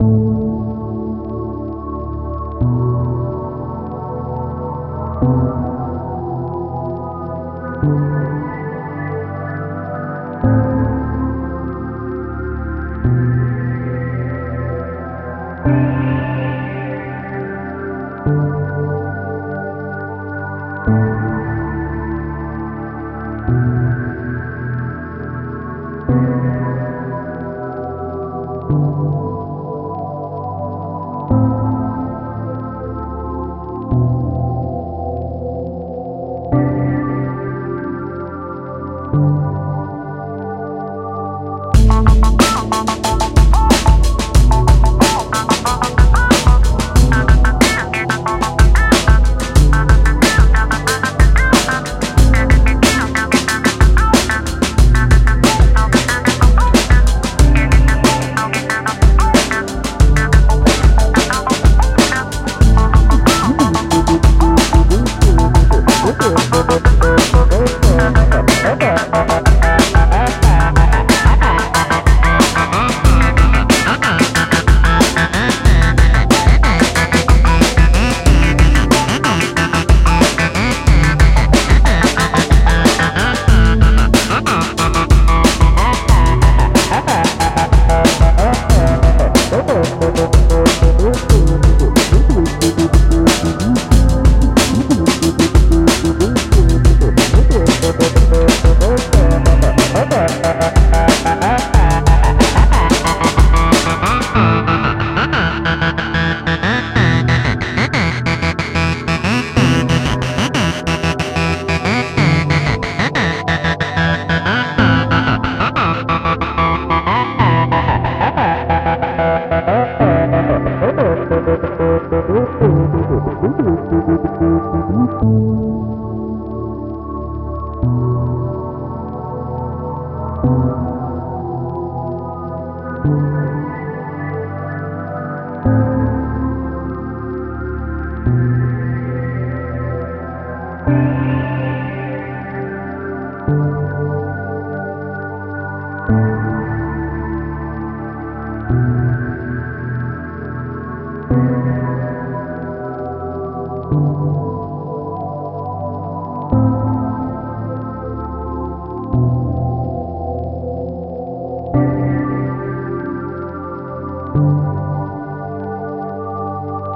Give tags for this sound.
slow; ableton; synth; zebra

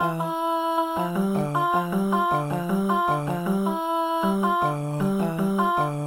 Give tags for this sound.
female; synthvoice

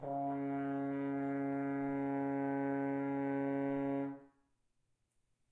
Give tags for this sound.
3; c; c-sharp; c-sharp3; french-horn; horn; note; tone

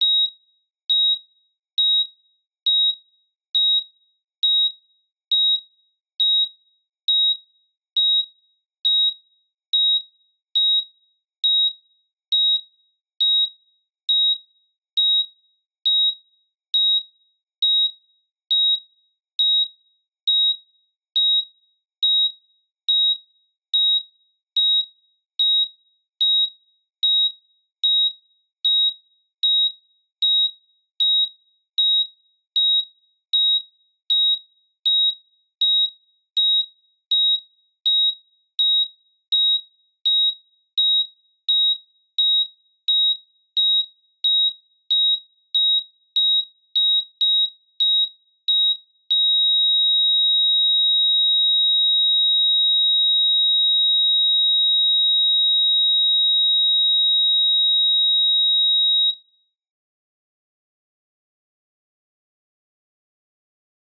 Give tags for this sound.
beep; ekg; flatline; fm; heart-monitor; hosptial; tone

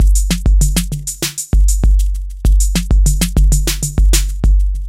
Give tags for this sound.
Vintage; Beat; Drum; Electric; IDM; Trap; DrumLoop; Machine; Electronic; Drums; Loop; Electro; House; Retro

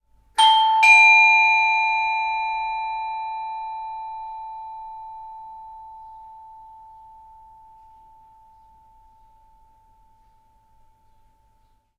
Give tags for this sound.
bell; door; rings; doorbell; ringing

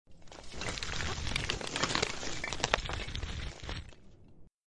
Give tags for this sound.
bone; bones; crawl; crawling; creepy; crunch; drag; dragging; dry; eerie; fright; frightening; ghastly; haunted; horror; monster; rattle; rough; scary; scrape; scratch; skeleton; skull; slide; sliding; spooky; terror

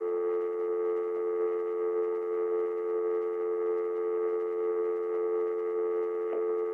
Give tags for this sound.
tone; telephone; dial

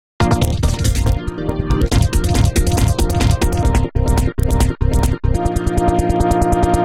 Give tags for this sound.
ambient; background; d; dark; dee-m; drastic; ey; glitch; harsh; idm; m; noise; pressy; processed; soundscape; virtual

